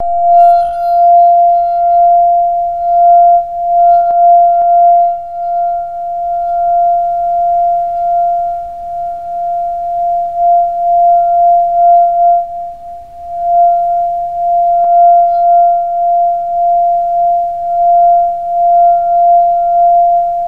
A wet finger rubbing on the outside edge of a crystal wine glass.
wine-glass, crystal, hum, frequency-hum